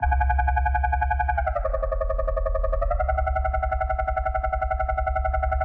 drum and bass synth loop dnb 170 BPM FM wobble